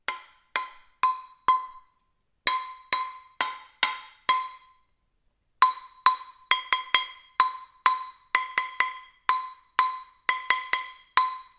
Kitchen Pan 01-01
This recording is from a range of SFX I recorded for a piece of music I composed using only stuff that I found in my kitchen.
Recorded using a Roland R-26 portable recorder.
Foley, Percussion, Household, Cooking, House, Home, Kitchen, Indoors